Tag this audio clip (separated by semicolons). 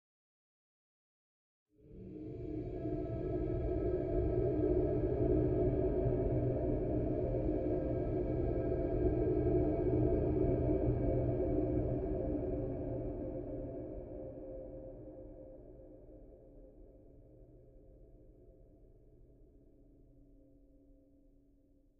Ambient; Drone; Soundscape; Space